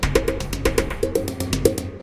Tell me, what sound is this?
kbeat 120bpm loop 6
A slightly ethnic sounding drum percussion loop at 120bpm.